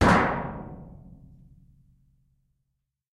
Plat mŽtallique gong f dble
household, percussion